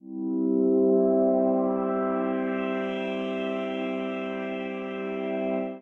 Happy Pad Chord
Just a simple chord of a digital pad being played. It sounds relaxing, happy, calm, etc. etc.
Made with BandLab
atmospheric, calm, chord, digital, happy, melodic, pad, phaser, relaxing, relieving, soft